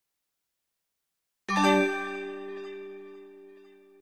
Item Collect 2
Sound for picking up an item in a game.
game, game-sound, ping